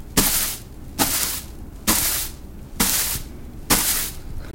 Straw Broom Sweeping Gentle
Sweeping the floor with a straw classic broom gently
broom, sweep